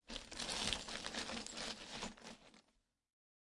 crawling-broken-glass017
Bunch of sounds I made on trying to imitate de sound effects on a (painful) scene of a videogame.